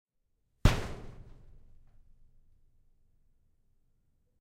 The sound of kicking the wall inside a small metal shed.
Recorded using the Zoom H6 XY module.